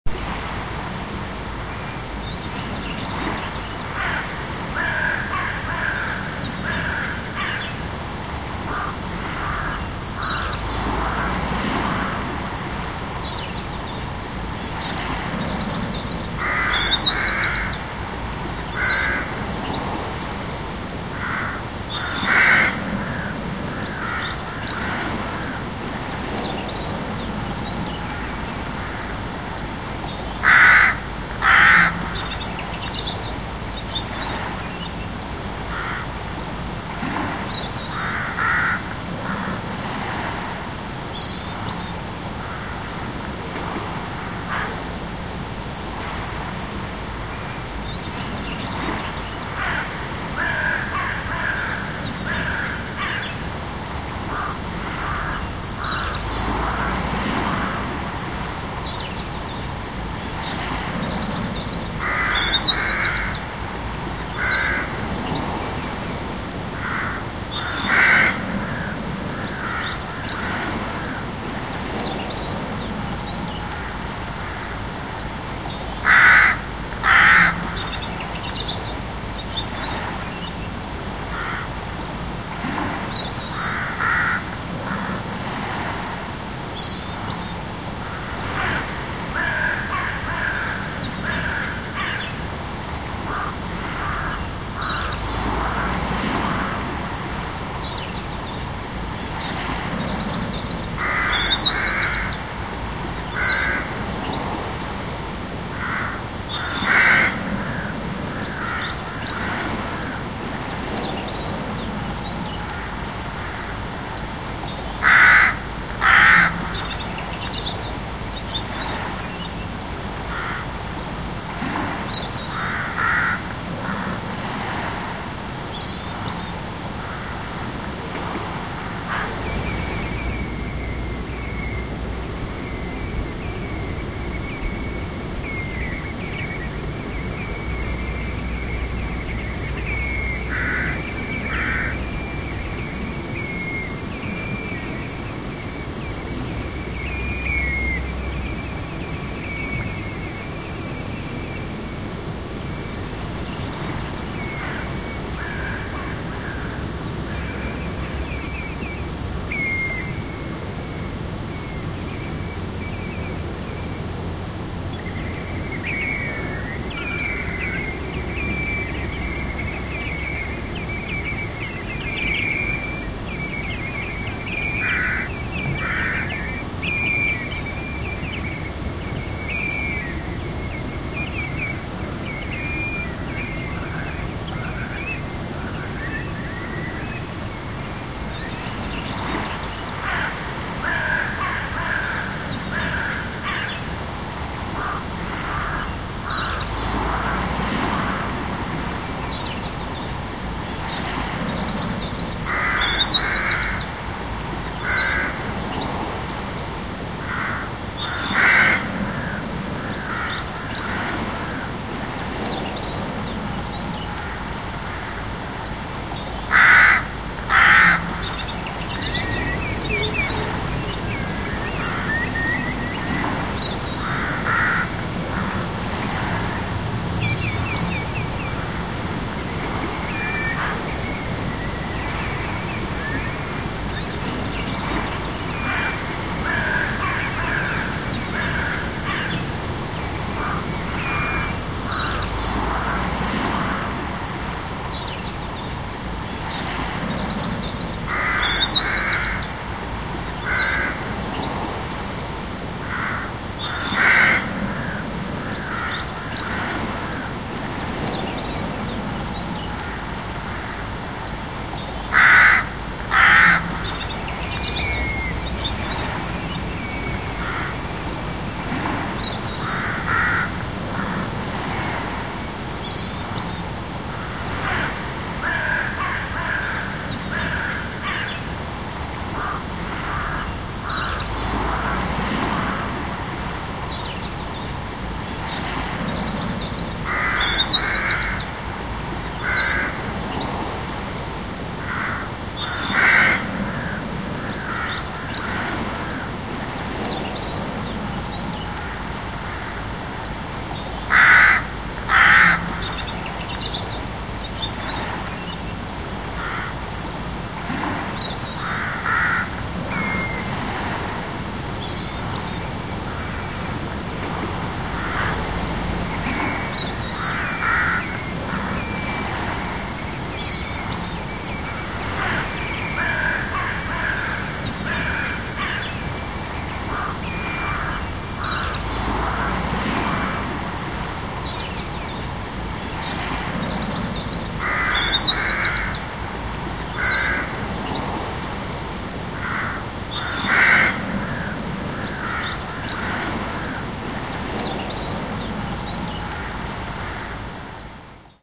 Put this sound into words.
Ambi - Scottish Coast
Ambience
Scottish Coastline
Featuring Scotland's iconic crow population, finches, sparrows, oyster catchers, and the relaxing sound of the tide.
ambient, field-recording, water, sea, nature, coastal, birds, scottish, oyster-catcher, crow, ambience, atmosphere, coast, relaxing, tide, ocean, caw, seashore, coastline, shore, crows, beach, waves, calm, wave, scotland, bird